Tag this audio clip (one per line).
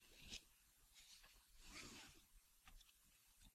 car
click
Foley